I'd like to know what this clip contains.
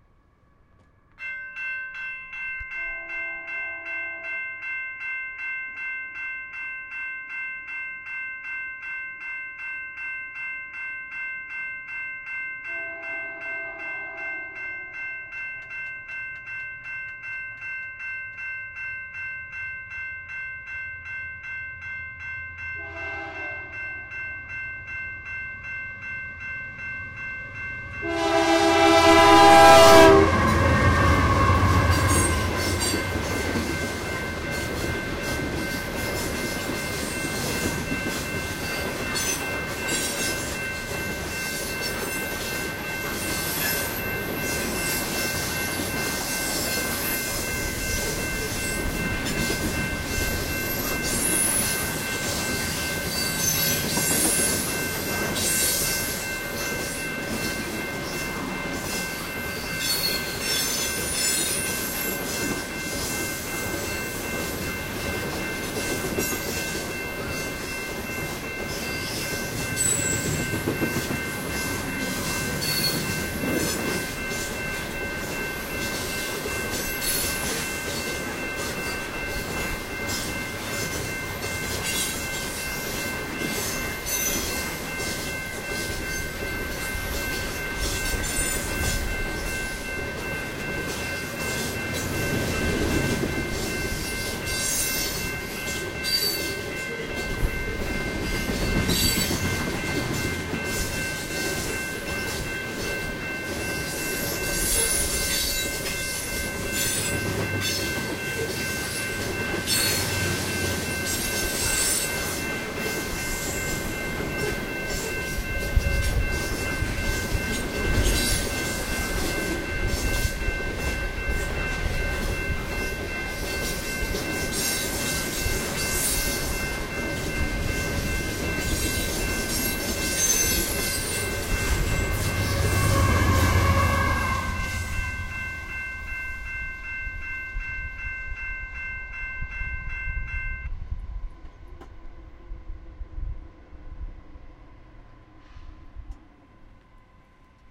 Freight Train Crossing
A perfect “freight train passing” sequence. A complete approach and departure starting with crossing alarm, and a few train whistles growing in loudness. The train was about 80 cars long. Listen all the way to the end to hear the bell stop as the train passes into the distance. Listen carefully and you can also hear the traffic blocking arm rising up. I got lucky here. I was in just the right place at the right time. Recorded on a Zoom H2 in Fort Langley, British Columbia, Canada.